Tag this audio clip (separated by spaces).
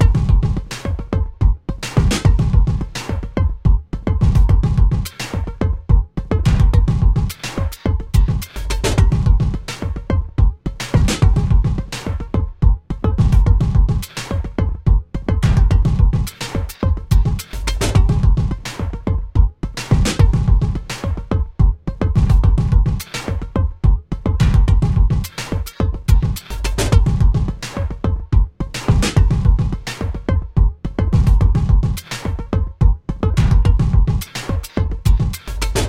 beat drum electro loop